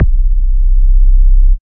Ultra Subs 004
Ultra Subs were created by Rob Deatherage of the band STRIP for their music production. Processed for the ultimate sub experience, these samples sound best with a sub woofer and probably wont make alot of sound out of small computer speakers. Versatile enough for music, movies, soundscapes, games and Sound FX. Enjoy!